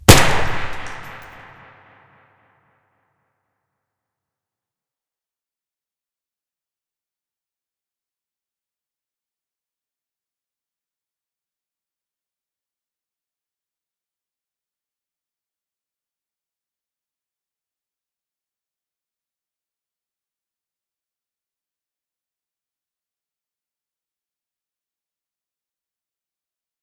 Gunshot in a big hallway
This is a little mixup I did to get the gunshot sound I wanted for a action video, hope you guys like it.
close, gun, handgun, pistol, shot